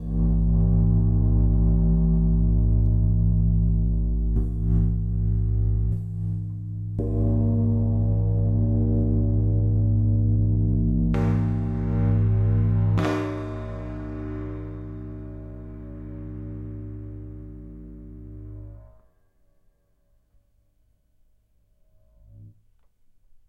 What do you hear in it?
Cymbal Drone Close-Mic 4
Beautiful metallic textures made by recording the sustain sound of a cymbal after it has been it. Recorded in XY-Stereo with Rode NT4 and Zoom H4 Handy Recorder.
stereo, metallic, close-mic, disharmonics, crash, ride, drone, iron, ambient, cymbal, rumbling, metal